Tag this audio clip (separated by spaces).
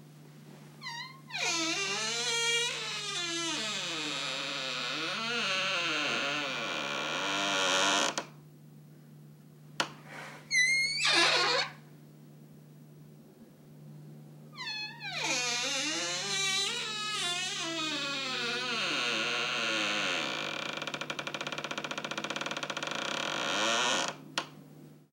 creak door horror household noise suspense